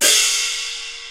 Recording of a single stroke played on the instrument Qibo, a type of cymbals used in Beijing Opera percussion ensembles. Played by Ying Wan of the London Jing Kun Opera Association. Recorded by Mi Tian at the Centre for Digital Music, Queen Mary University of London, UK in September 2013 using an AKG C414 microphone under studio conditions. This example is a part of the "naobo" class of the training dataset used in [1].
qmul, icassp2014-dataset, qibo-instrument, beijing-opera, chinese, idiophone, peking-opera, compmusic, chinese-traditional, cymbals, percussion, china